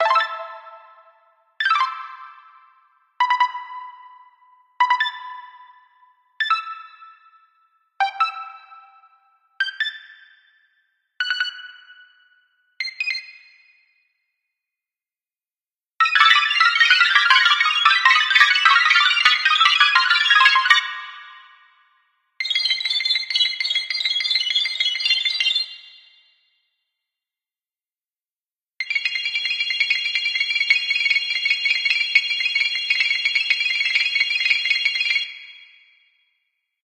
Just some more synthesised bleeps and beeps by me.